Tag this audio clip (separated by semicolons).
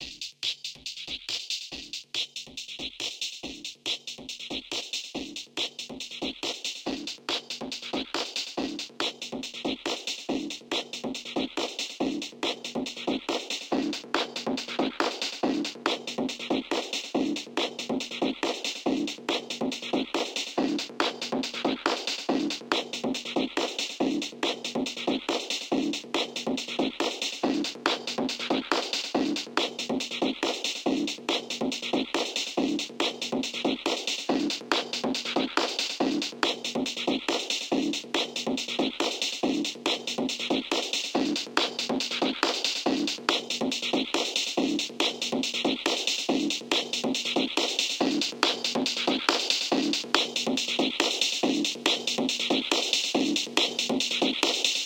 crescendo; dance; electronica; harmonic